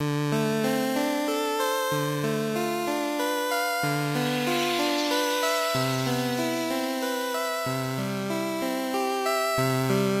arpegio01 loop
A simple chiptune arpeggio sound of reminiscence.
8bit, arpeggio, loop, reminiscence, synth